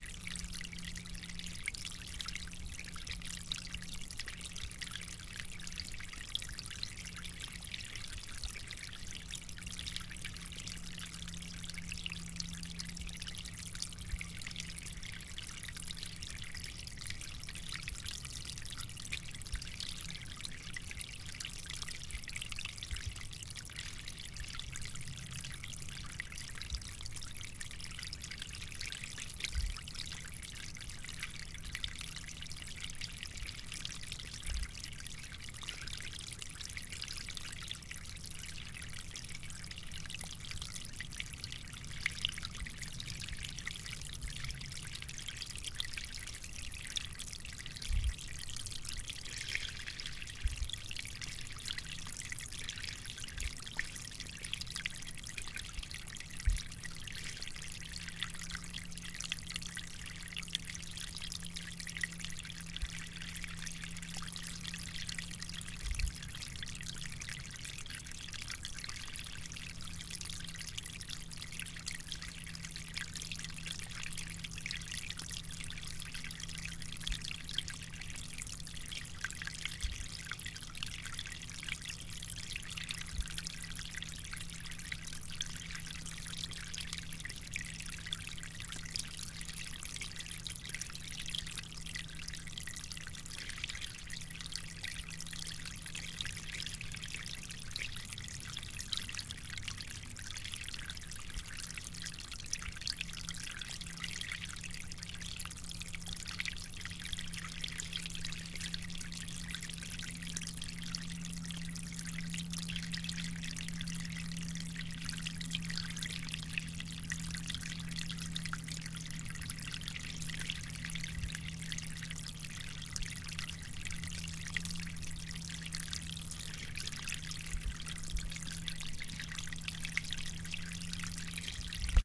Running water of a rivulet (very small brook)on its way to the main stream. Recorded on Zoom H2 in the south of sweden.
running, flowing, river, babbling, gurgling, brook, rivulet, water, creek, stream, trickle